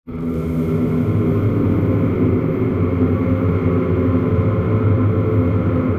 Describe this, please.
Lala short Synth Vocal Dark Chant Mystery Fantasy Loop 20200625 01
short Synth Vocal Dark Chant Mystery Fantasy Loop
Edited: Adobe + FXs + Mastered
Chant, Dark, Fantasy, Loop, Mystery, Synth, Vocal, abstract, effect, freaky, future, fx, lo-fi, noise, sci-fi, sfx, short, sound, sound-design, sounddesign, soundeffect, strange